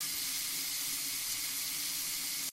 Bathtub Filling
Bathtub
Sounds Will Be Done Boys
Filling, Fill, Bath